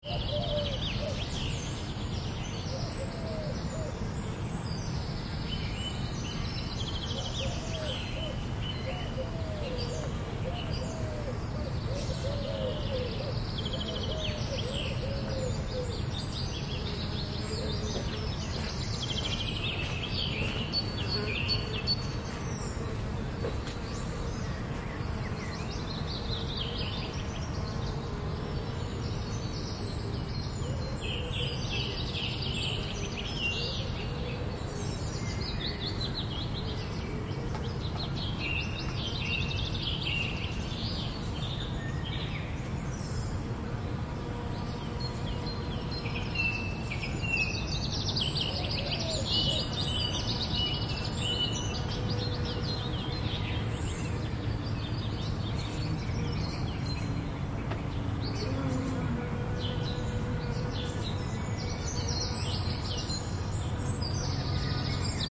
birds and bees Olympus LS3 Vogelgezwitscher mit Bienen
a short ambience sound
field-recording, LS3, Olympus, bird, birds